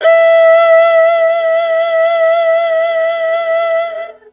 The Erhu is a chinese string instrument with two strings. Used software: audacity
Violin, Erhu, Strings